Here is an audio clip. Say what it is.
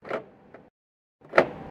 RFX Lock and Unlock Doors
The sound of a car locking and unlocking doors.
Mic Production
close, lock